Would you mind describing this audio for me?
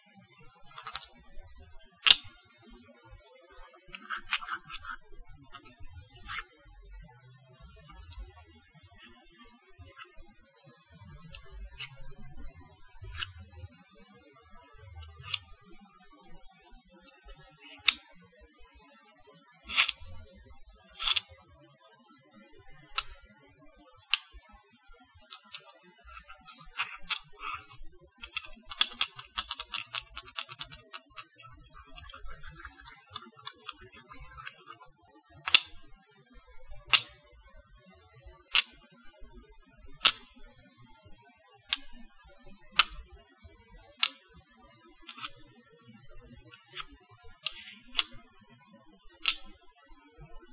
porcelain clinks slides
two mini porcelain plant pots clinking together